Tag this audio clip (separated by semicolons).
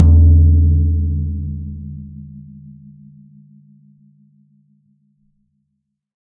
velocity; 1-shot; tom; drum